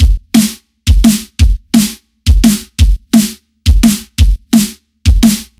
This is a really punchy drum loop that runs at 172 BPM